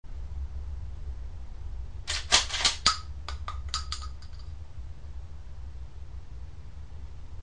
I racking the action on a 12 gauge Remington 870 shotgun. There is also the sound of the empty hull being dropped on the concrete floor.